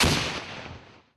Specific details can be red in the metadata of the file.